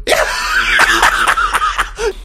Joker Laugh 1
Evil joker-like laugh which I recorded a while ago, perhaps similar to Heath Ledger
crazy, evil, insane, laugh, laughing, laughter, man, maniac, villain